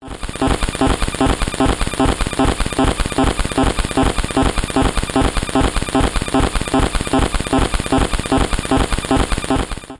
Loop of blips, beeps and clicks. Made on an Alesis Micron.